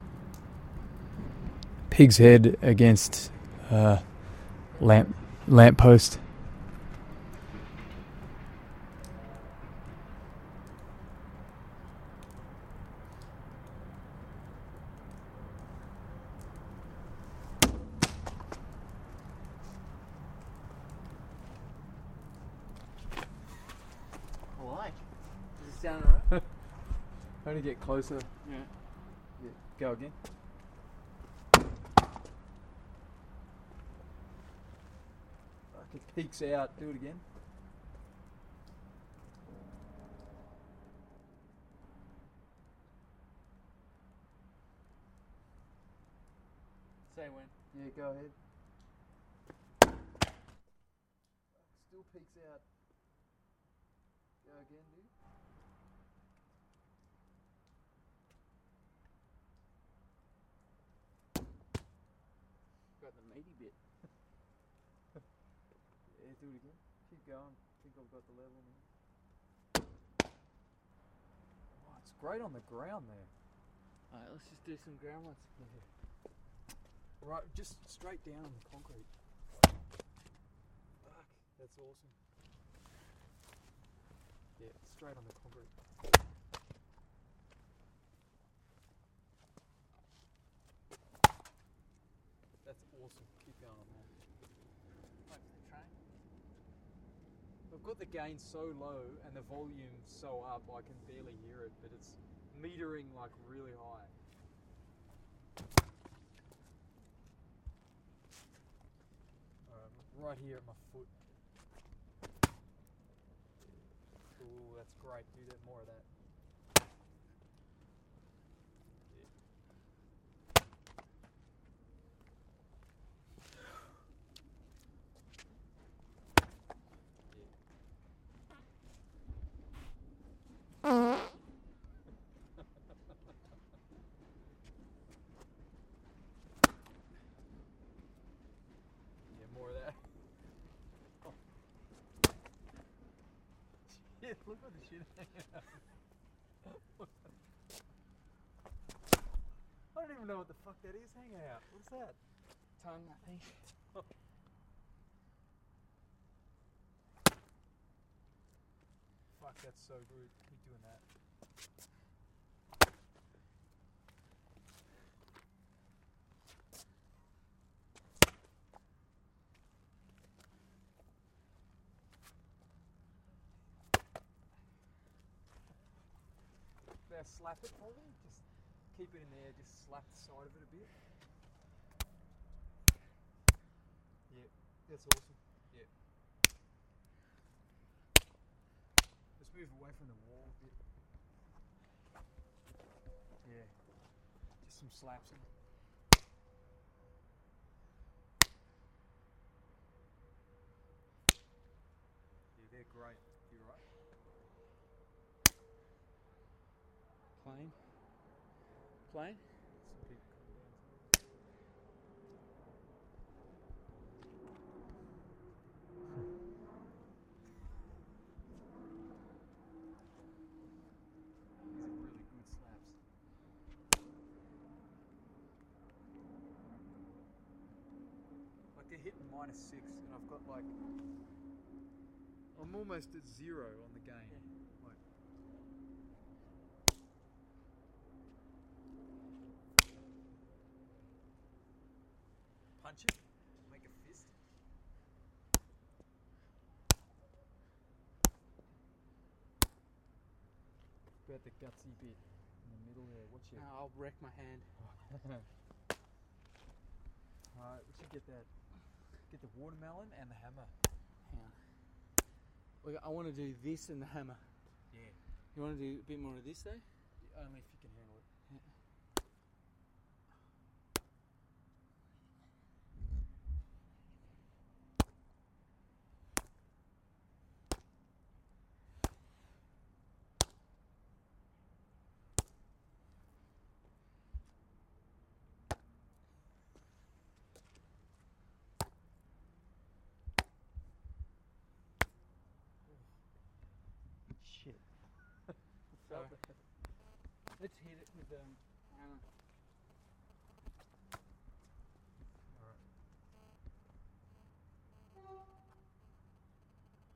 pig head lamp post
pig, head, hitting, field-recording, lamp-post